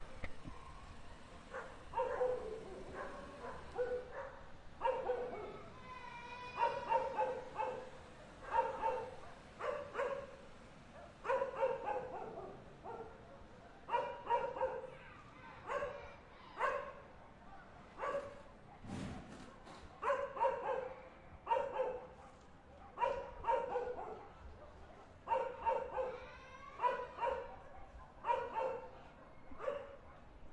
Barrio, Noche Neighborhood Night
My dangerous neighborhood at night.